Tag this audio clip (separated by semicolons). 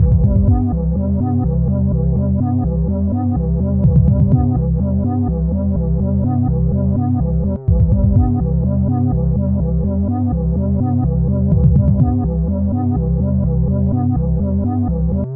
waawaa; ambient; dance; dub; techno; drum-bass; loop; blippy; bounce; hypo; effect; glitch-hop; humming; electro; pan; Bling-Thing; club; beat